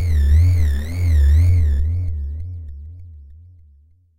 Sine wave created and processed with Sampled freeware and then mastered in CoolEdit96. Mono sample stage three AM modulation.
larry, sine, synthesis, sound, free, sample, sack, hacky, hackey, sac